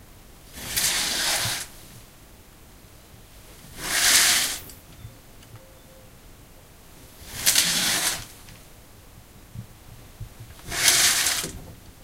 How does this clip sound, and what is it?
Curtains being opened and closed
closing, opening, Curtains